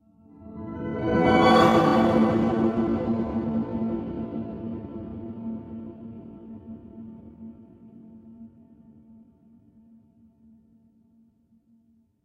harp band filtered sample remix